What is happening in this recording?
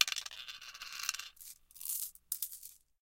Glass mancala pieces being dropped into a wooden board and being picked up.
mancala, clatter, glass, game, wood